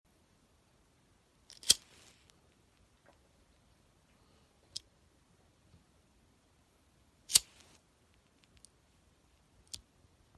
lighter flick
Sound of a lighter sparking and lighting. Recorded with iPhone 7.
lighter, spark, ignite, ignition, gas, flame